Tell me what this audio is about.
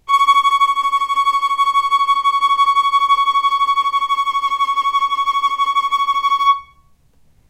violin tremolo C#5
tremolo, violin